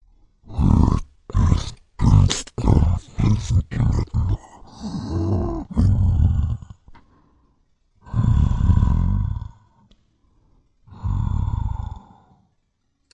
Creature rest 11/14
Creature, rest, sleep, song